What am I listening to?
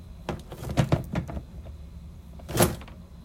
Trash can lid; openclose
Opening and closing a foot pedal trash can
trash-can, lid